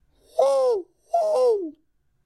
Bird - Artificial 2
This is an artificial bird sound, made with a human voice.
human, bird, voice, chirp, artificial